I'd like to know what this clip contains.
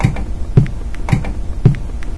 My friend's TV makes this weird boom bass sound everytime you turn it on. I recorded it and made a simple beat out of it! iT'Z THE HIP-HOP TELEViSiON STAR! :D